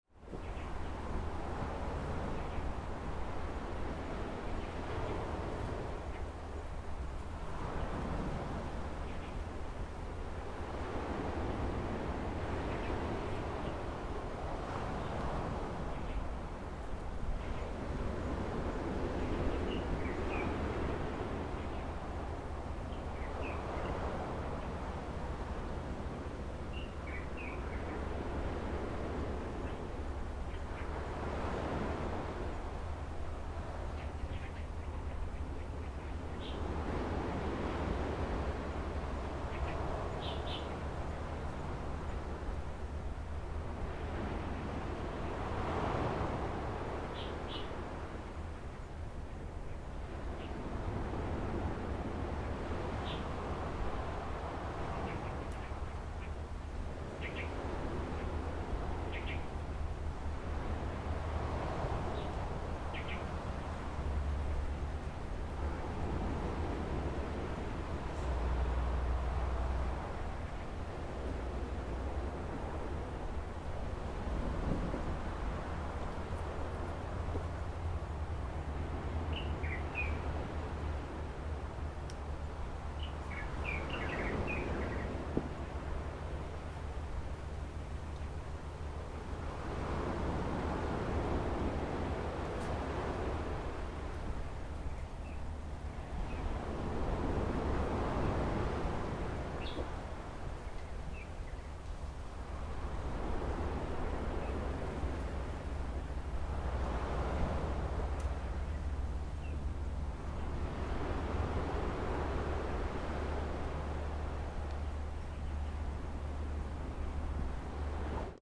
Coloane HacsaBeach Forest birds low
Hacsa Beach Coloane Macau 2013